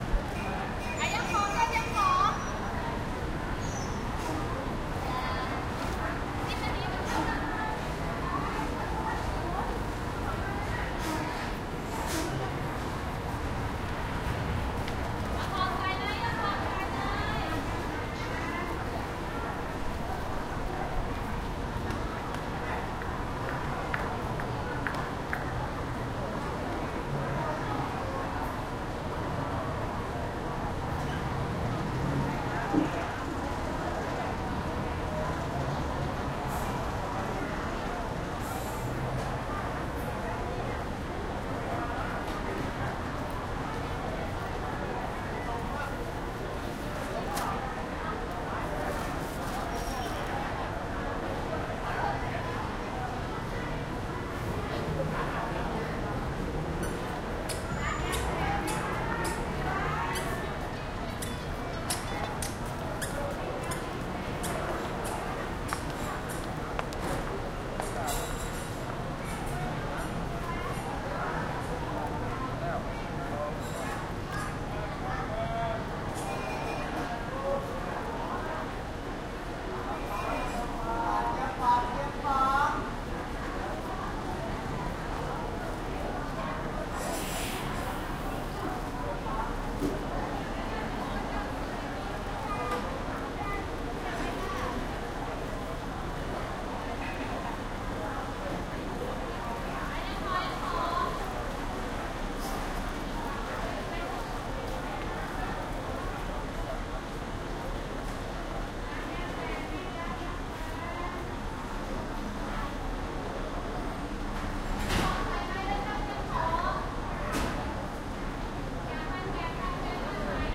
Chiang Rai Bus Station
This was recorded around mid-day at the Chiang Rai Bus Terminal.